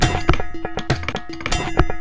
A drum loop I created for a reactable concert in Brussels using kitchen sounds. Recorded with a cheap microphone.
They are dry and unprocessed, to make them sound good you
need a reactable :), or some additional processing.
kitchen, rhythm, beat, loop